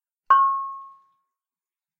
talempong pacik 03
Traditional musical instrument from West Sumatra, a small kettle gong played by hitting the boss in its centre
gong, indonesia, minang, pacik